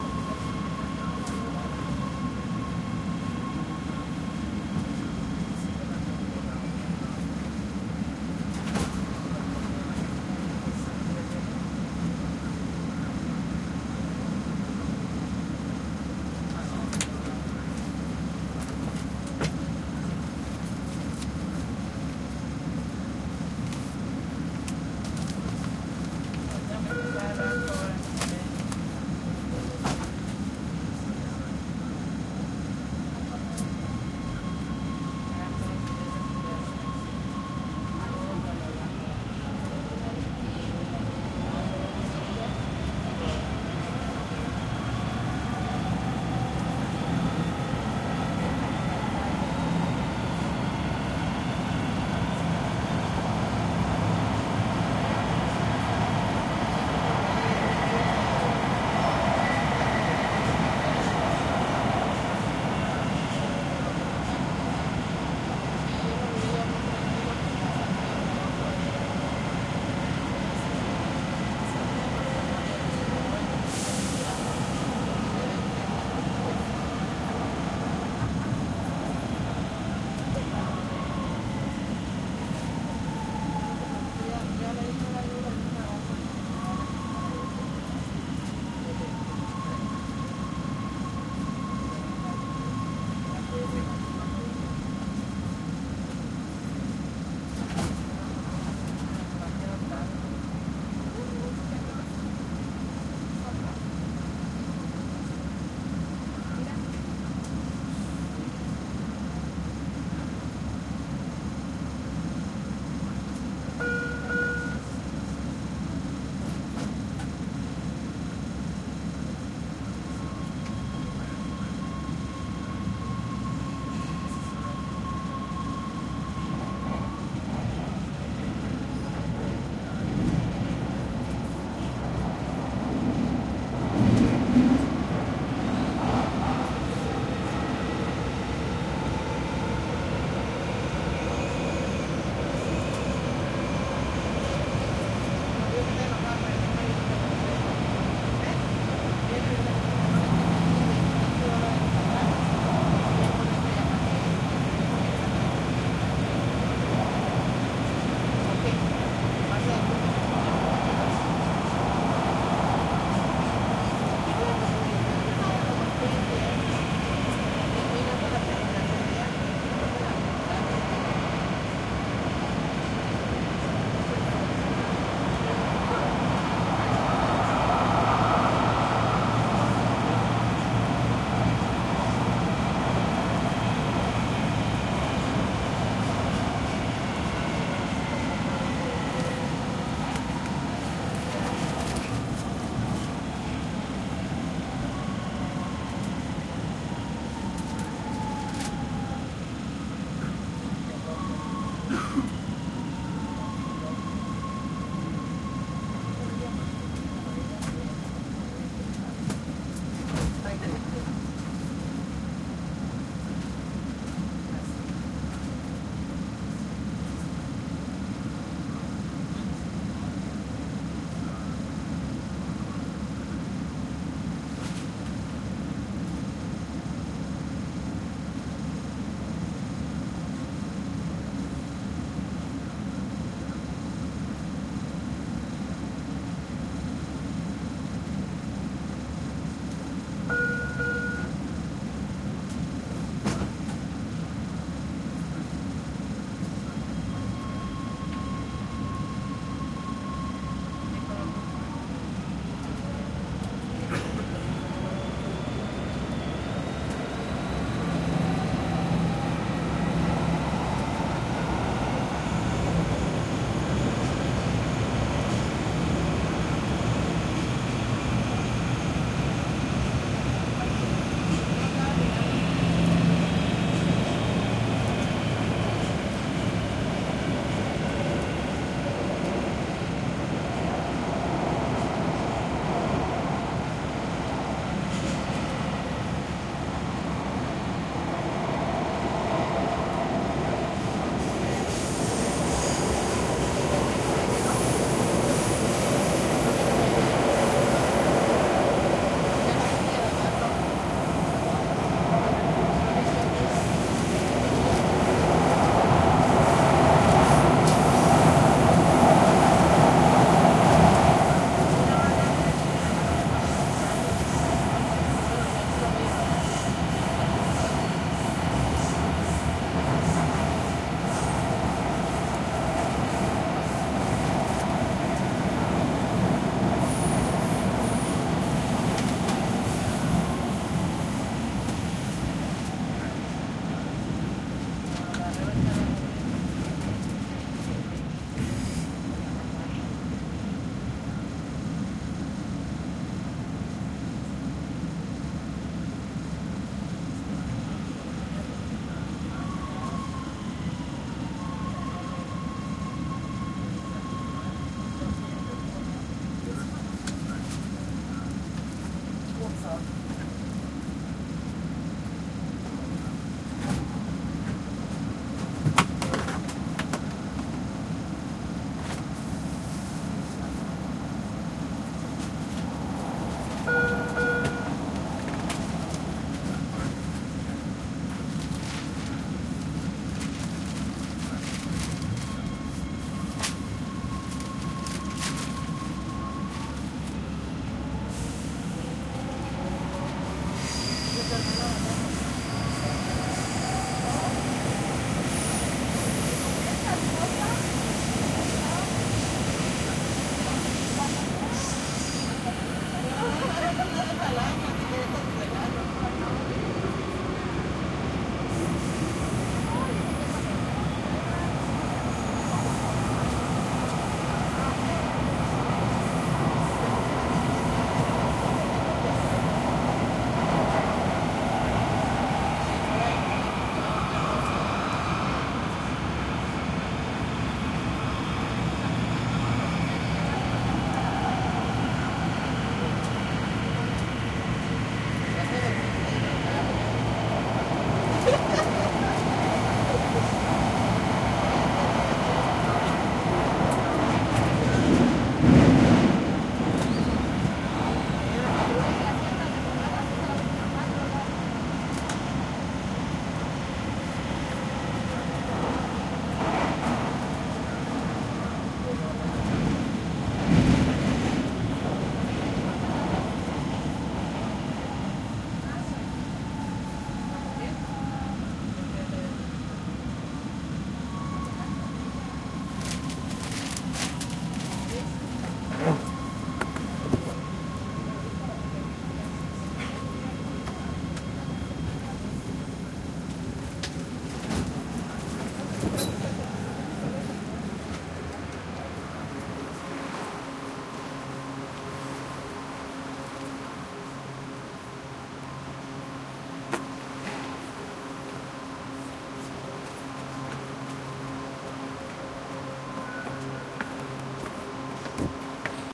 Every field recordist has to do trains and subways eventually. Heres riding the L.A Subway.